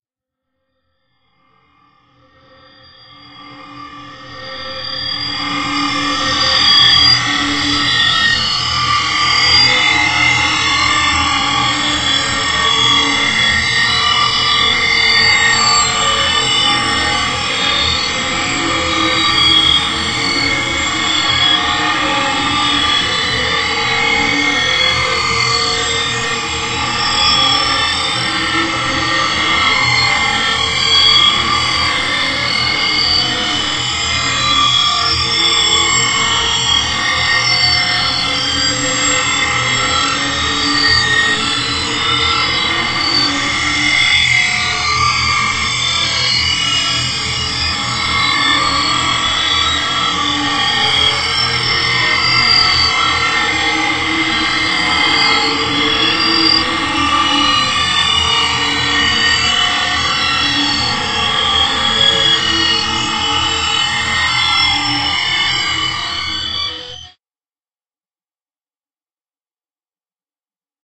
Still random frequency transformed with plugins. This time it's a saw waveform moduled by a sine LFO. Add a little of this and a little of that. Make me thing at a the sound of the rain on a strange planet!
aliens, ambience, artificial, computer, design, digital, electronics, fantasy, FX, machine, noise, robot, science-fiction, sci-fi, space, weird